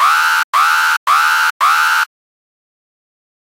4 long alarm blasts. Model 3